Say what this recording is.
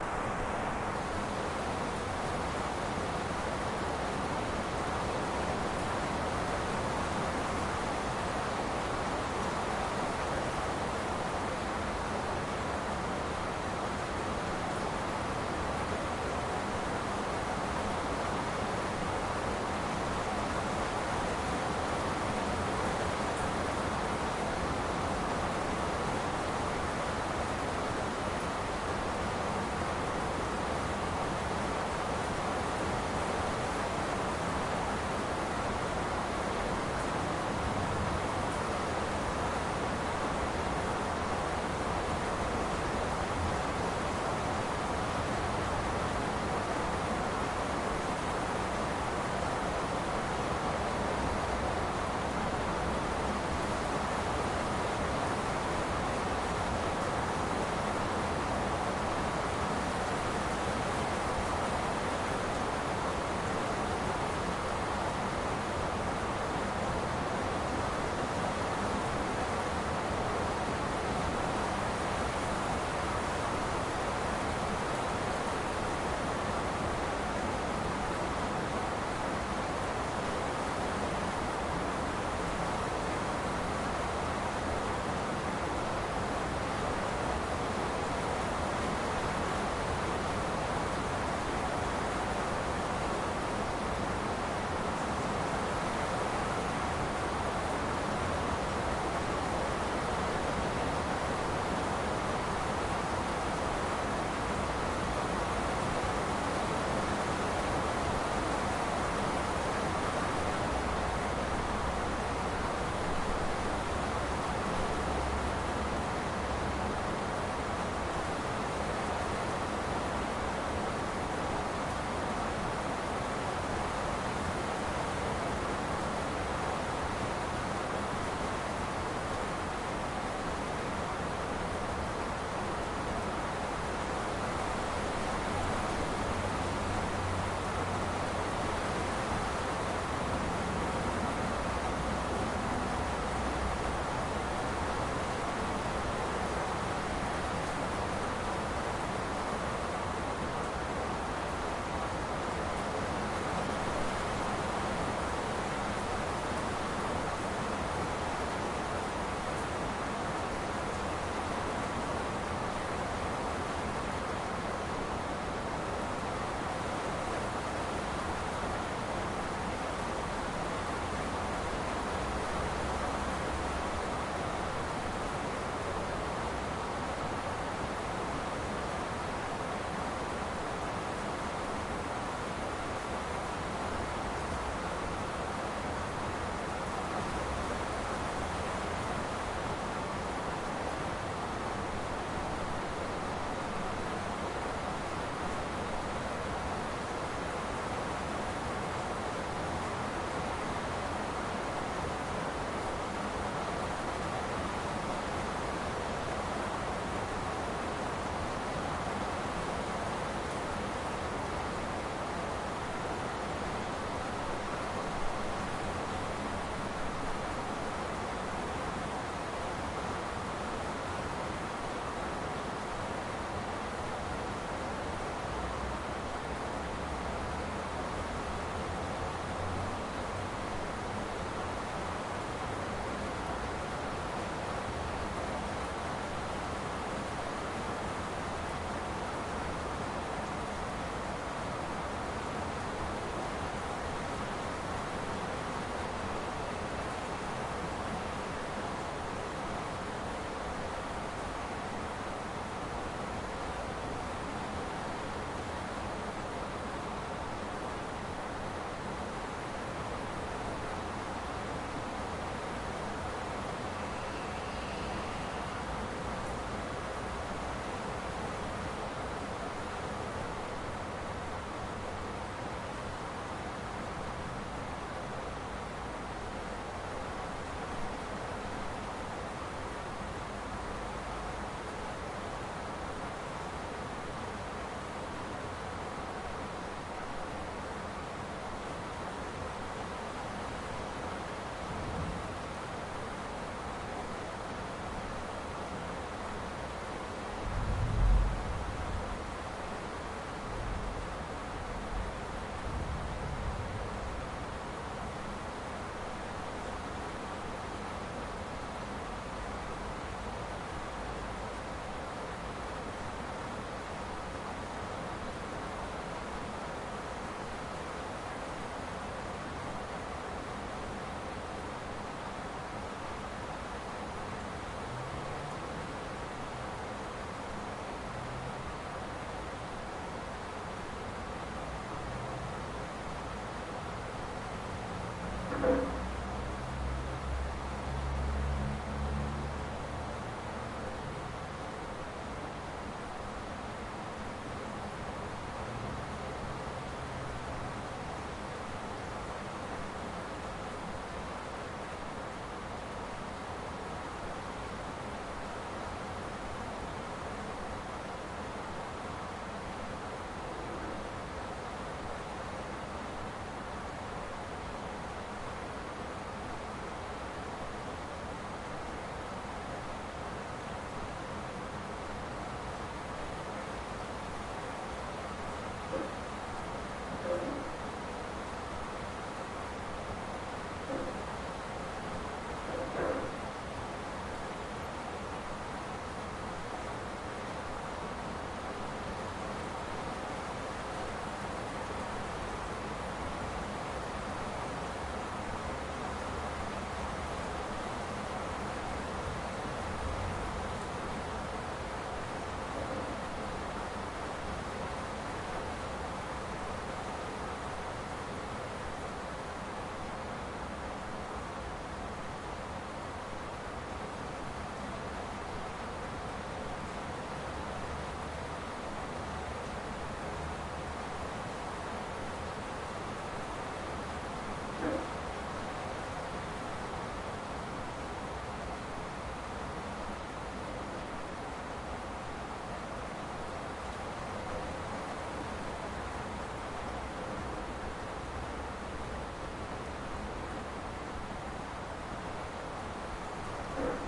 I used a ZoomH4. A rainy afternoon in San Jose Costa Rica, careful with a chair noise, but its only a couple of seconds, enjoy!